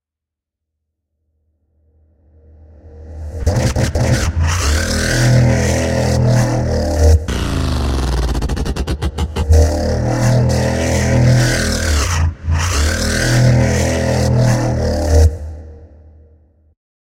megatron growl
you can use this for dubstep or something bpm:140
the sound was made with fl studios harmor.
transformer, dubstep, growl, drop, dub-step